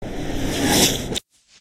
Power up sound for space ship waste systems. Created for a game built in the IDGA 48 hour game making competition. The effect is based around a reversed sample of a car door strut recorded using a pair of Behringer C2's and a Rode NT2g into a PMD660.